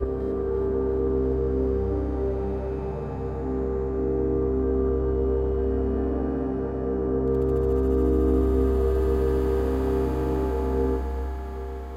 11 ca pads
deep sounding suspense
ambience, atmos, atmosphere, atmospheric, background-sound, horror, intro, music, score, soundscape, suspense, white-noise